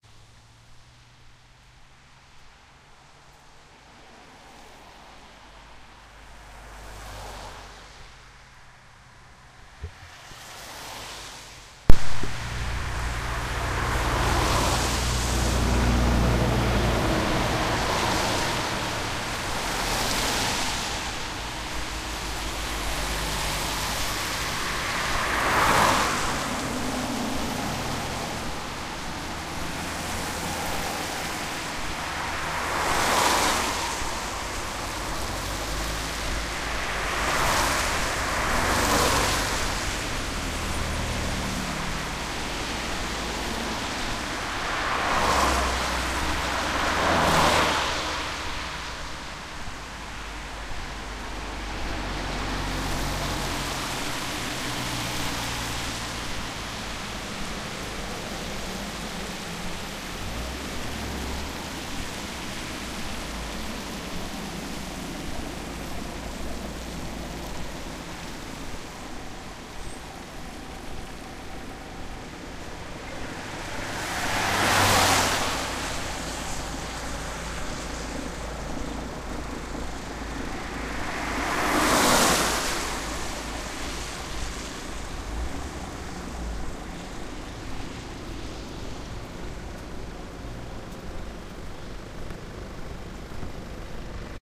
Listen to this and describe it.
Cars on street
ambience, car, cars, city, street, transport